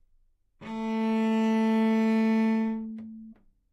Part of the Good-sounds dataset of monophonic instrumental sounds.
instrument::cello
note::A
octave::3
midi note::45
good-sounds-id::4286
single-note, cello, good-sounds, multisample, A3, neumann-U87